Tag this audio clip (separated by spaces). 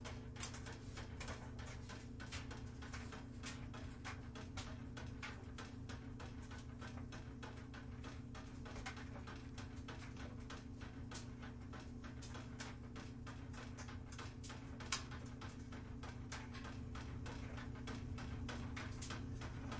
Home Dryer